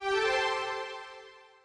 Select sound thing
menu-click, violin